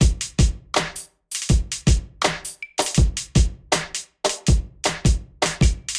4/4 - 80 bpm - Drums - Crazy Techno
A simple techno beats loop.